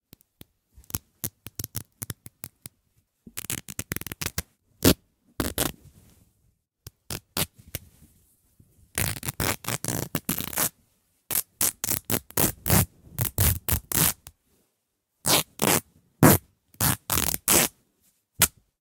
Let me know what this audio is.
Ripping a fabric bedsheet close to the mic.